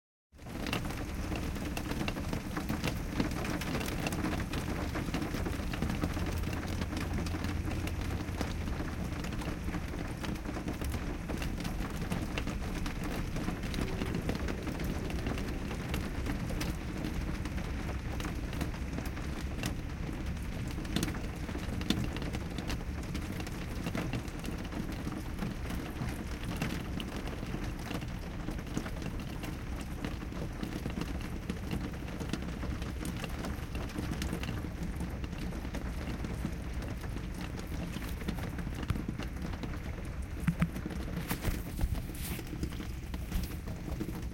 rain on the window
Hey! If you do something cool with these sounds, I'd love to know about it. This isn't a requirement, just a request. Thanks!